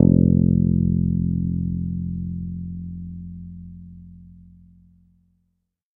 First octave note.
bass, multisample, electric, guitar